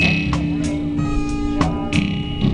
weird loop, who knows, from a live recording circa '97
acoustic, experimental, lo-fi